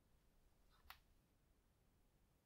Clicking knee
I was recording my clicking shoulder and thought I might record some clicks from my knees while I was at it. My knees have clicked since at least my teen years, probably longer.
Recorded this morning with my ageing (soon to be retired) Zoom H1.
cracking, bones, joints, crack, knee